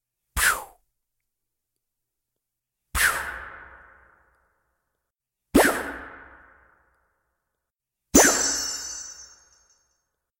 Mac - Pew - Poof 1
pew, magic, Puff, fairy, sparkle, foosh, tinkle, spell, ethereal, poof, chimes